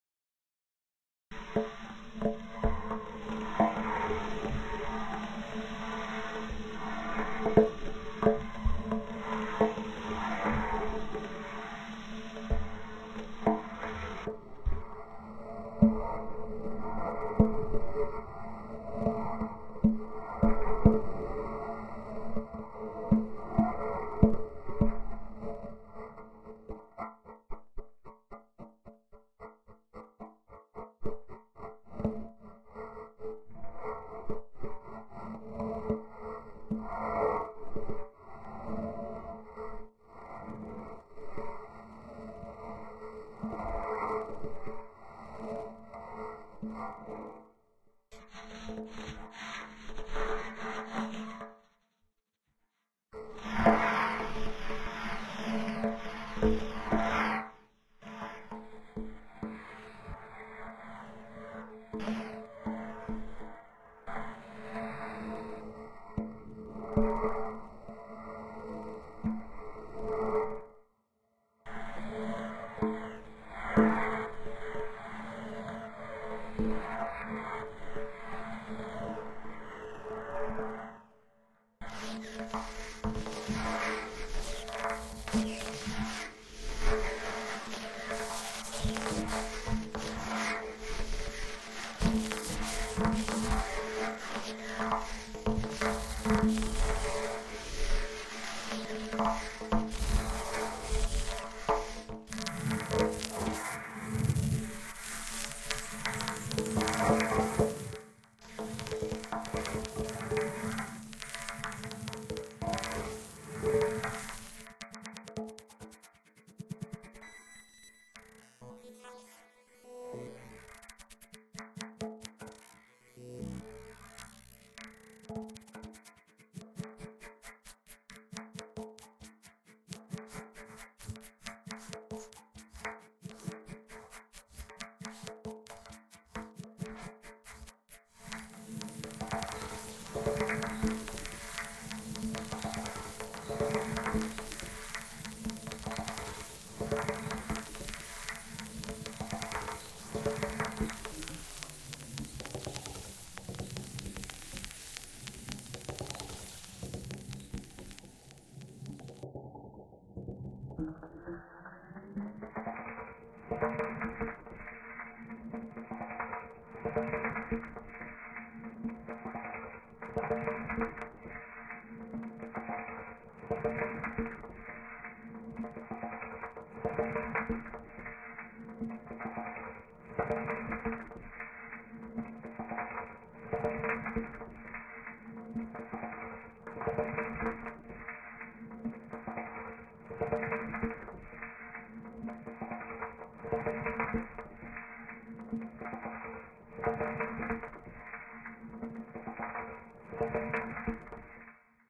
Sound of vinyl transients going through a frame drum resonator in kaivo...